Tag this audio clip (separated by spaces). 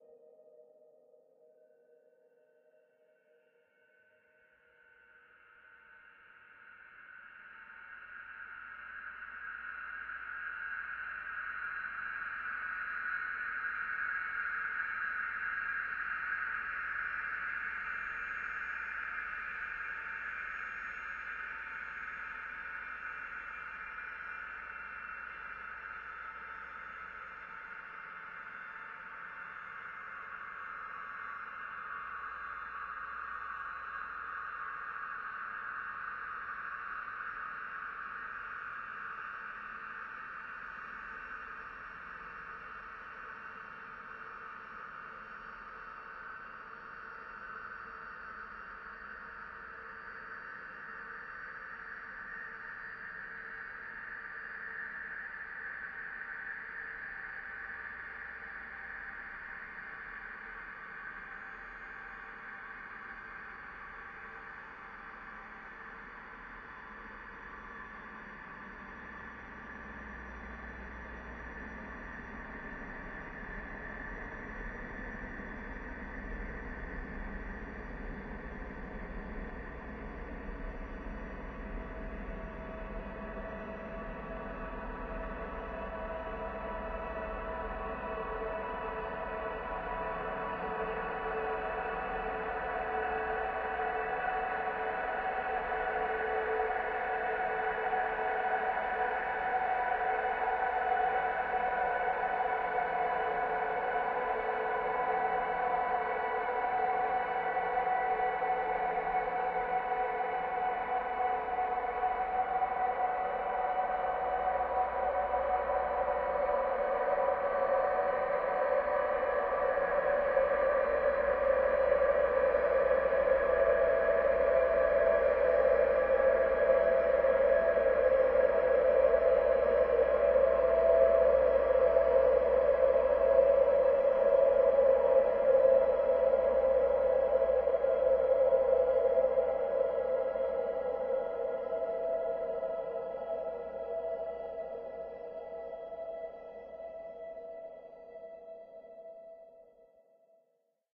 ambient artificial drone evolving multisample pad soundscape